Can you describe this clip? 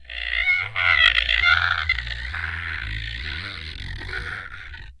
scree.flop.03
friction, idiophone, daxophone